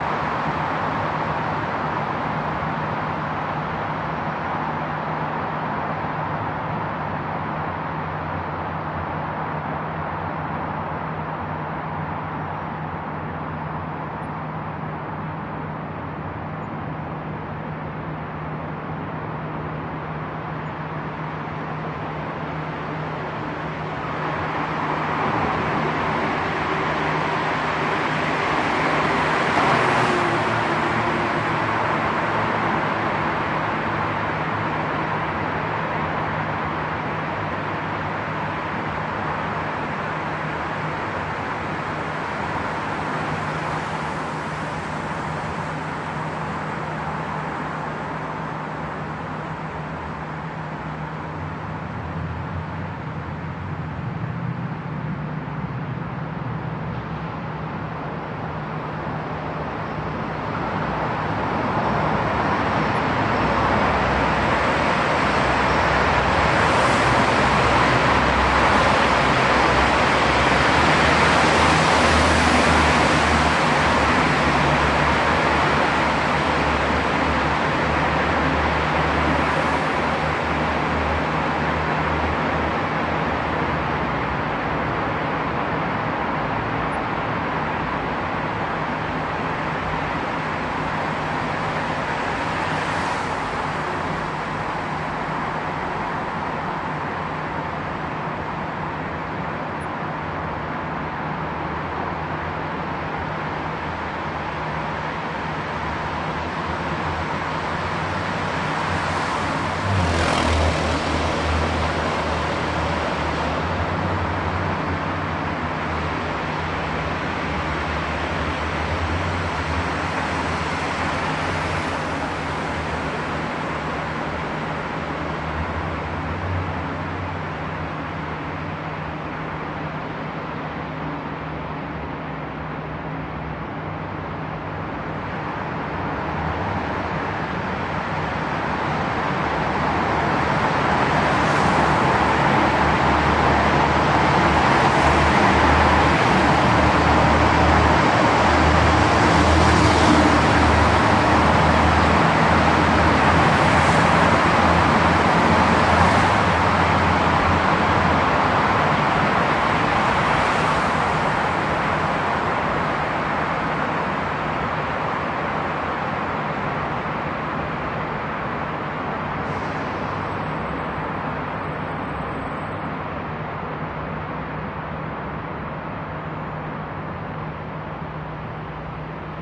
A recording of sound and traffic within a tile lined tunnel called the Broadway Tunnel (SF Ca. USA.)
tunnel, traffic, field-recording, purist, doppler